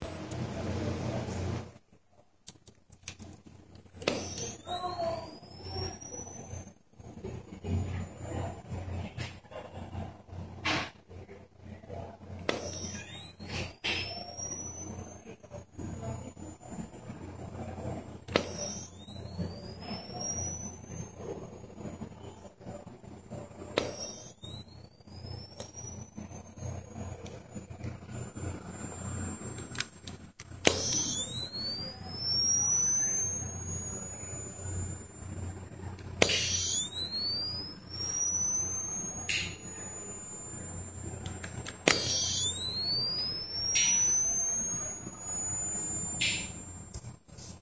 using smartphone to record the charging sound of external camera flash
charging, flash, record